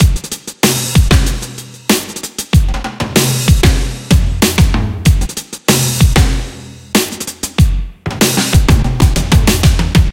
Drum Beat 2 - 95bpm
Groove assembled from various sources and processed using Ableton.